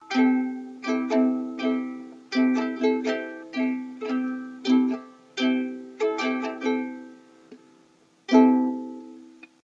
Me strumming some notes on my ukulele